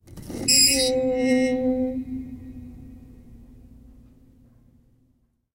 ghost bottle hospital bed
recordings of a grand piano, undergoing abuse with dry ice on the strings
abuse, dry, ice, piano, scratch, screech, torture